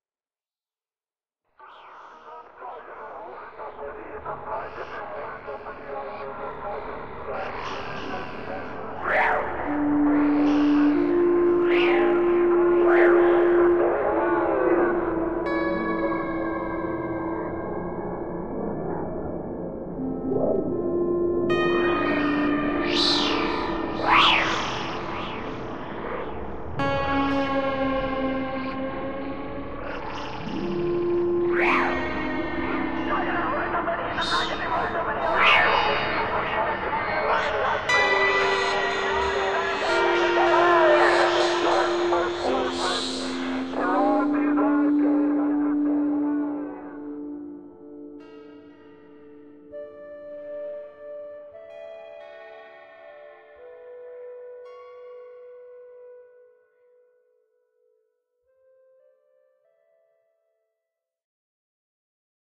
coma cluster [extract 2]
Strange voices and eerie sounds, guitars, flutes. An extract from Coma Cluster, an ongoing project. Part of my Strange and Sci-fi pack which aims to provide sounds for use as backgrounds to music, film, animation, or even games.